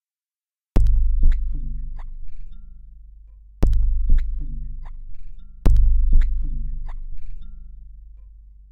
lowercase minimalism quiet sounds